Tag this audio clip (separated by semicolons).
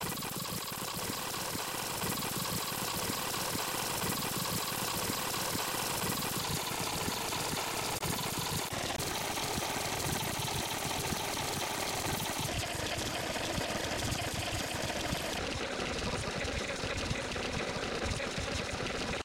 slowing; down; sound; tape; fast; forward